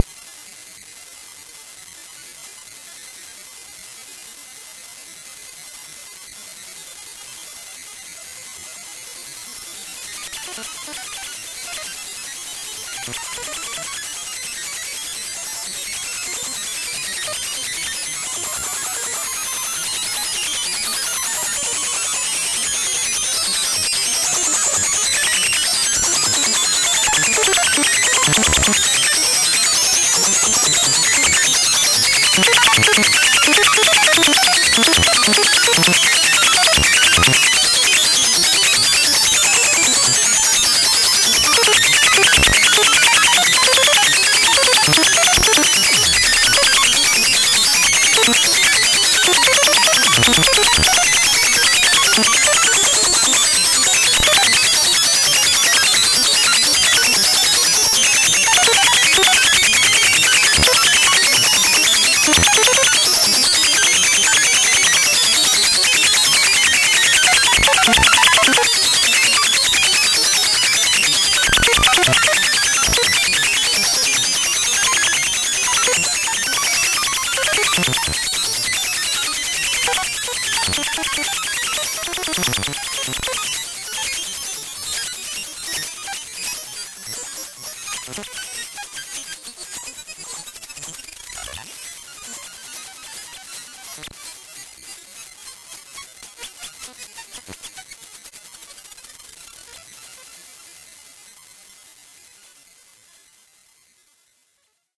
Genetic programming of sound synthesis building blocks in ScalaCollider, successively applying a parametric stereo expansion.